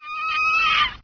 Save a woman cry, generate effect like reverse then fade in. It seem like a bird cry.